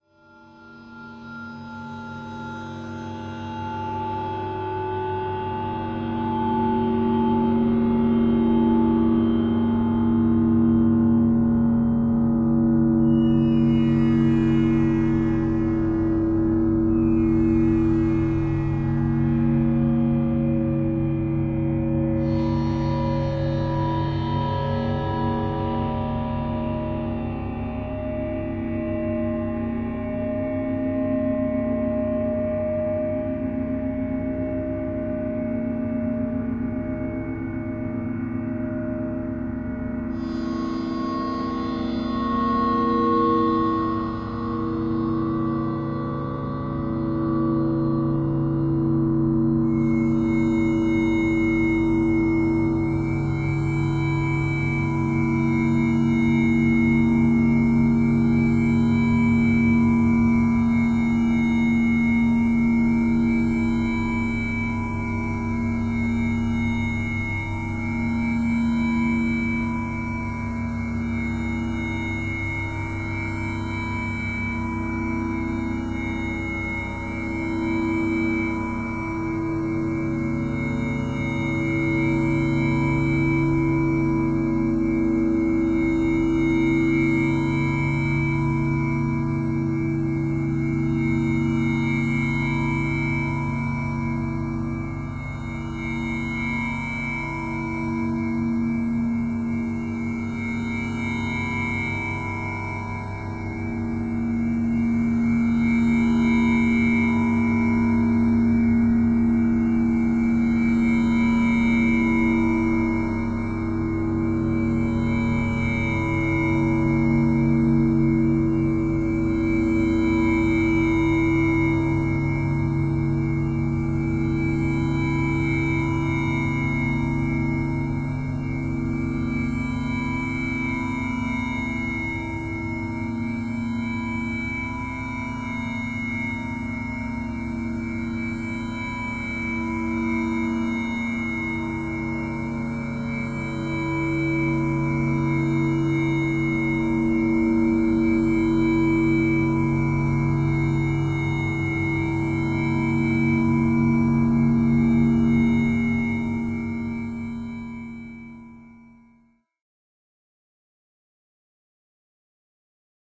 The Cymbal in My Life
A drone created from processed acoustic material, a bowed cymbal. The many shifting harmonics are emphasized by the time expansion processing. An imaginary, abbreviated, electronic version of a Morton Feldman composition.
drone, harmonics, metallic, overtones, resonant